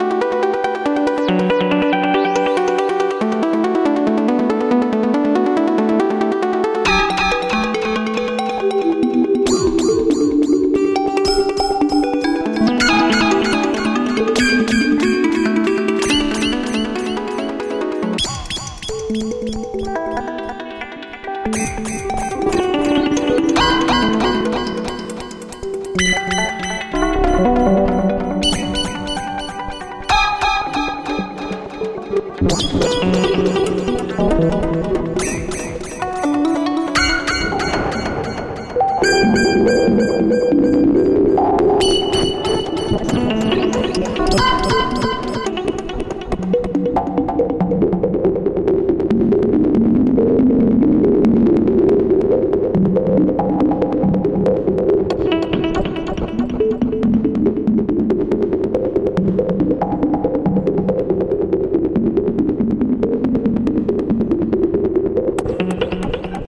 1 minute 06 second reel from my track “The Clock Sleeps” from my album Mechanisms 2. This reel has 4 splices.